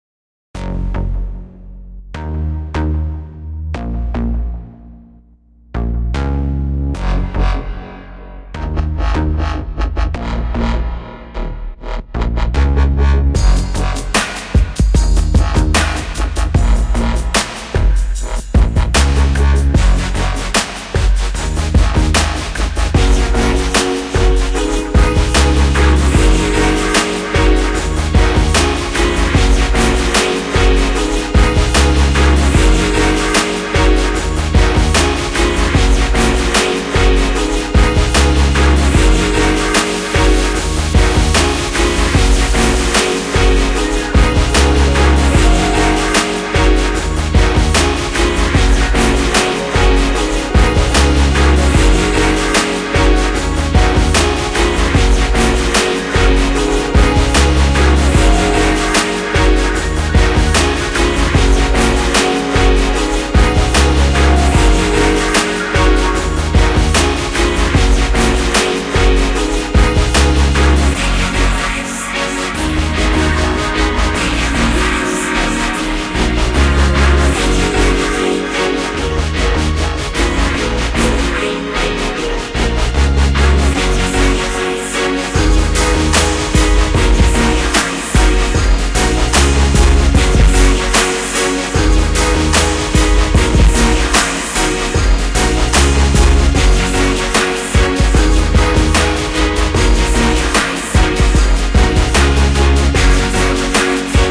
EDM Sample 2
An EDM beat. This sound would probably work best in dance/DJ/EDM songs. It's really cool-sounding. This sound was created with Groovepad.
beat, beat-drop, build-up, buildup, dance, dj, drop, drop-beat, dub, dub-step, dubstep, edm, effect, electronic, fx, glitch-hop, groove, house, melody, music, rave, rythm, song